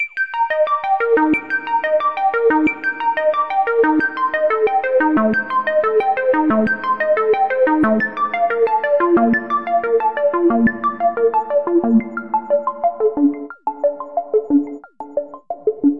FLee Arp
Made in korg Gadget. Chicago.
D, korgGadget, synth, arpeggio, KORG, loop, 120bpm